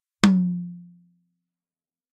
PEARL MLX 8 TOM
Pearl MLX 8" x 8" rack tom with Remo Pinstripe top head and Remo Diplomat bottom head.
8, maple, mlx, pearl, rack, tom